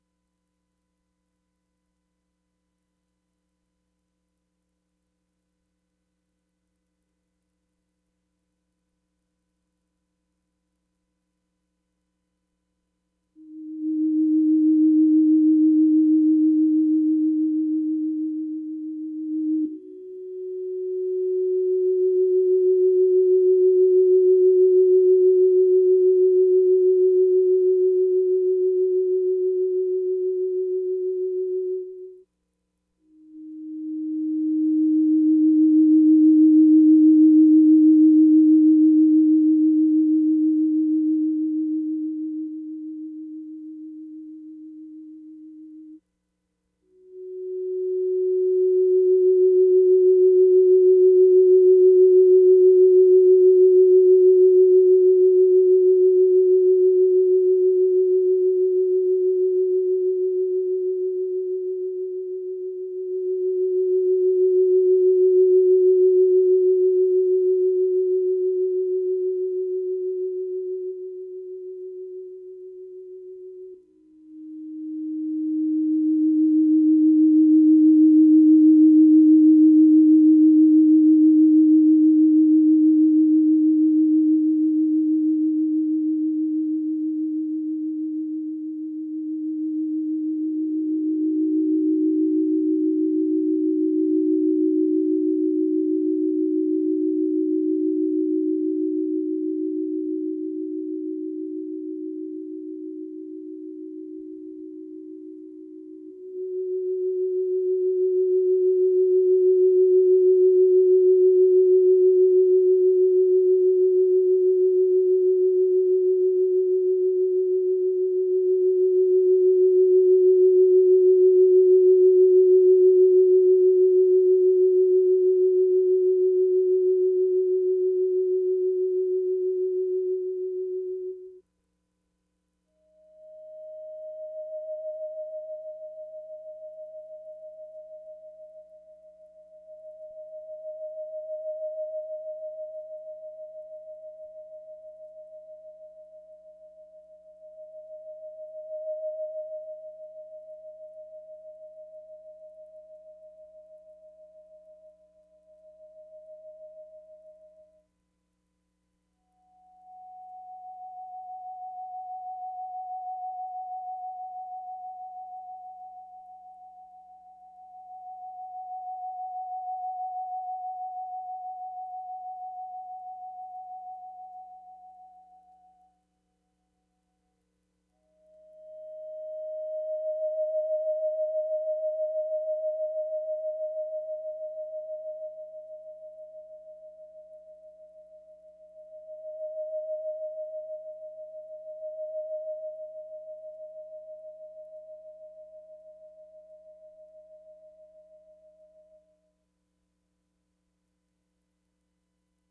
Theremin swells (pitch is around an Eb)